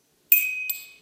AE86 Speed Chime (good quality)
AE86 Speed Chime in good quality. Some audios was taken from internet and mixed together with mines since this is how the real chime sounds on my car.